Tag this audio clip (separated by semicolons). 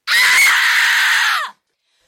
666moviescreams; Horror; Screaming; Grito; Cridant; Scream; Susto; Mujer; asustada; Ensurt; Woman; Espantada; Gritando; Frightened; Dona; Crit